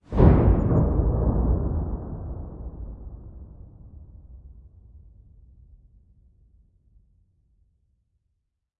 synthesized thunder made from a 909 clap